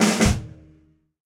snare rolldown
a percussion sample from a recording session using Will Vinton's studio drum set.
hit, percussion, roll, snare, studio